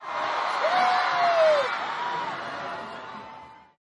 181001 001 crowd cheer
crowd cheer, a girl's voice was really loud..
cheering, crowd, applause